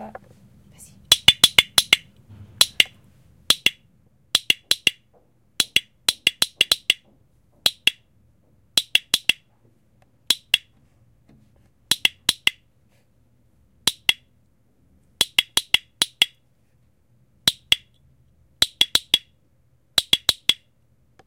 Mysound-IDES-FR-cricket1
A small metal object which French soldiers used to signal to one another in WWII.
Paris, cricket, IDES, WWII, France, signal, school